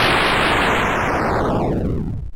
SFX Explosion 21
retro video-game 8-bit explosion
8-bit, explosion, retro, video-game